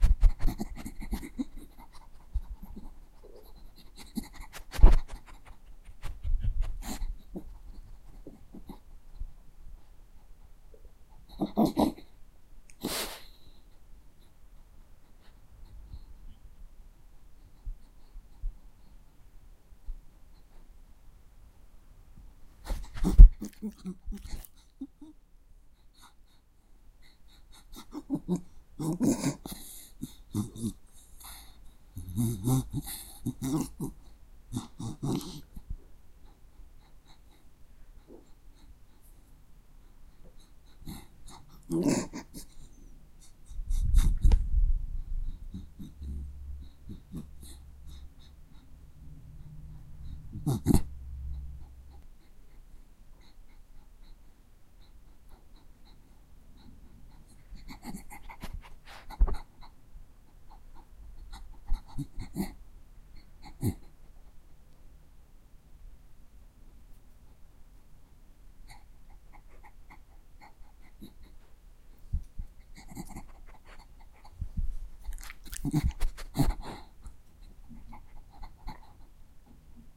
Sniffing Dog 2
Jack Russell dog sniffing.
animal, dog, eat, eating, food, jack-russell, nose, smell, sniffing